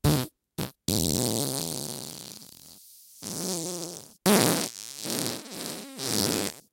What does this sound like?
Zoom H1n fart